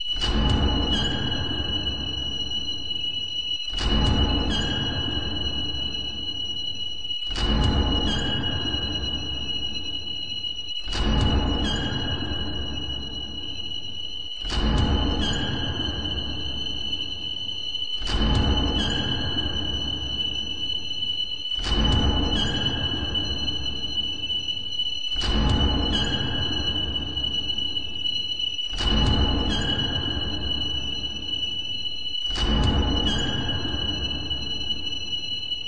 Creepy rhythmic sound loop
A creepy rhythmic sound that could be used to create suspense.
Created by combining these two sounds and editing them in Audacity: